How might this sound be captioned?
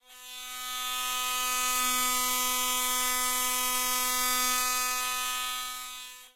A short recording of an electric toothbrush.